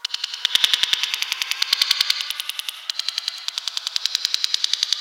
Hattab margaux 2017 2018 bicycle wheel
For this sound I recorded, I changed the tempo (82.00) and I used a reverberation (75) to reproduce the sound of a bike running.
bike, mechanic